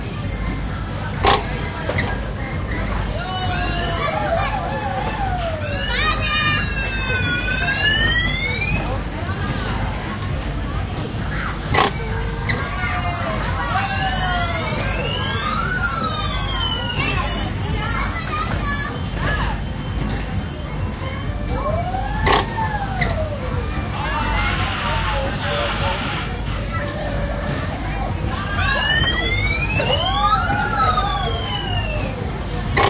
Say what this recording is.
carrousel atraccio carrer sant adria ,sant andreu
carrousel a la festa major de sant andreu del palomar